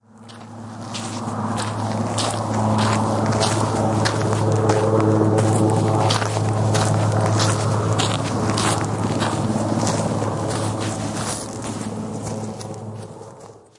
20220215.walking.under.01
Noise of footsteps along a dirt road, with helicopter overheading. Matched Stereo Pair (Clippy XLR, by FEL Communications Ltd) into Sound Devices Mixpre-3
aircraft, army, engine, field-recording, footsteps, gravel, helicopter, military, walking, war